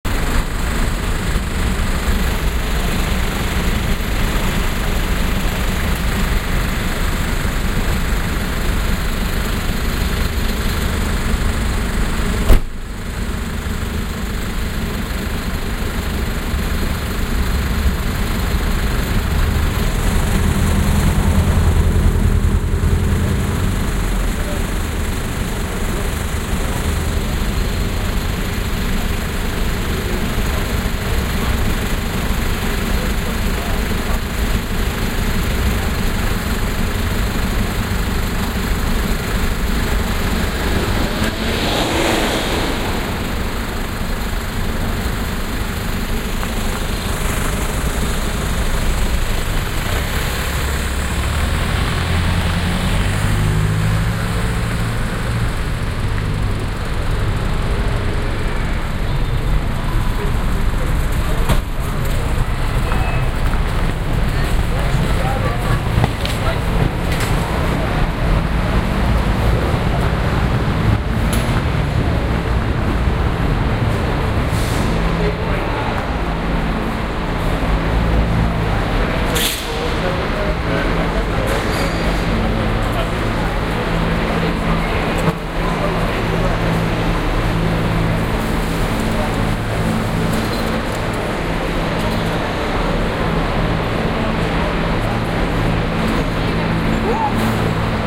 Marylebone - Taxis outside station